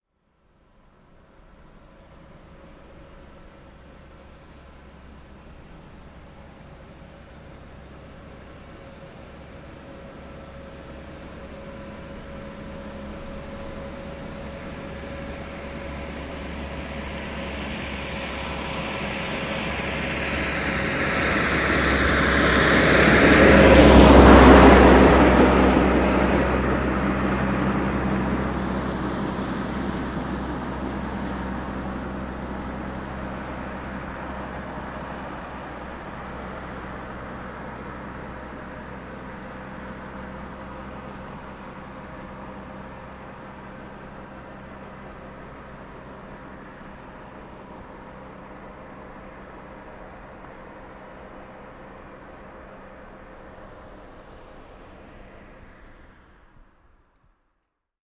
Mercedes Benz 170 SV, vm 1954, mersu. Lähestyy kaukaa, ohiajo vasemmalta oikealle, etääntyy.
Paikka/Place: Suomi / Finland / Kitee, Kesälahti
Aika/Date: 16.08.2001